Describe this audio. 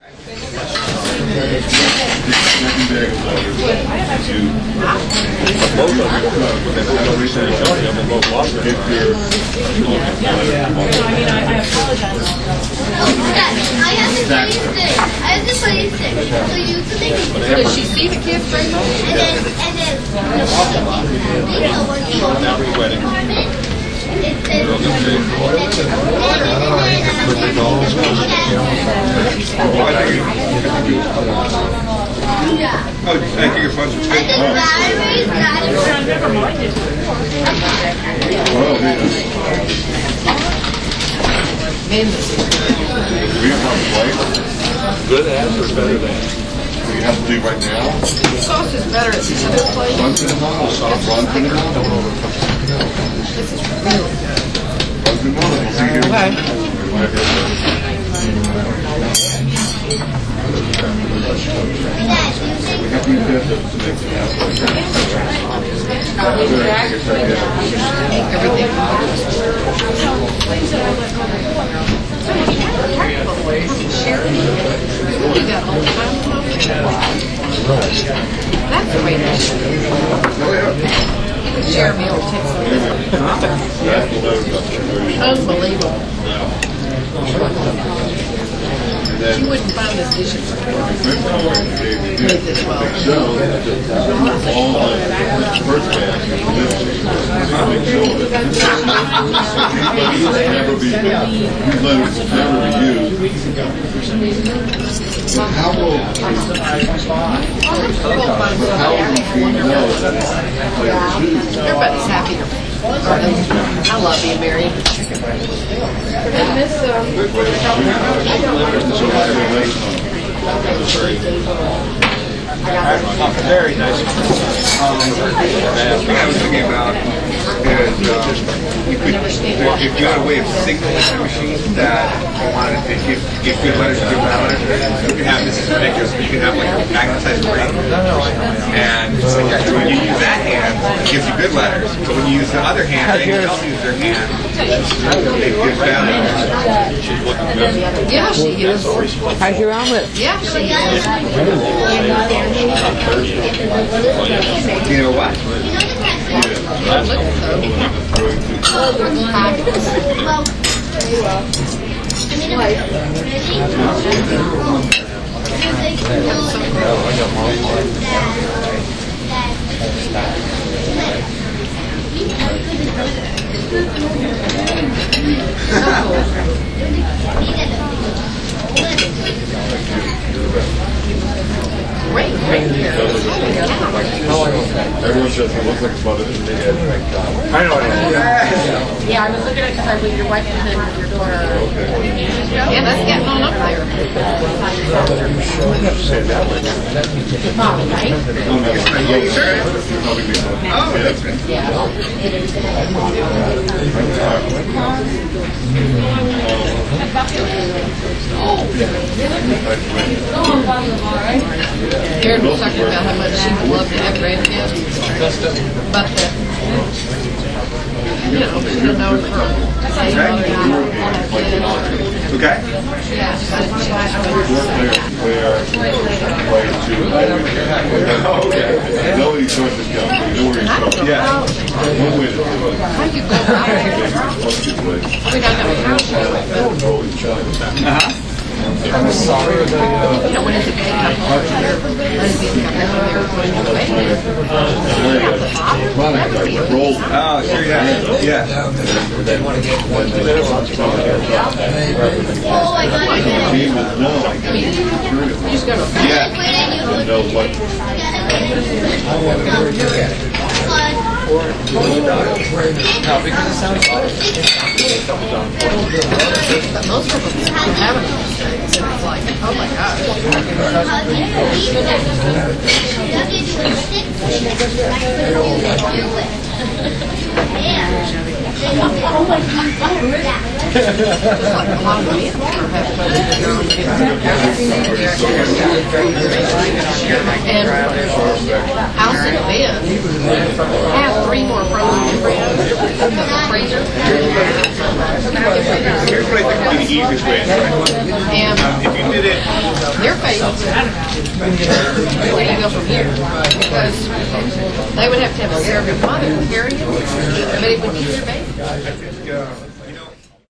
Lively Lunch Hour at Nautilus Diner, Madison, NJ
Recorded when my Husband & I were having lunch at our favorite diner. Lively crowd, some dishes and restaurant sounds.
Chatter, Crowd, Lively, Lunch, Restaurant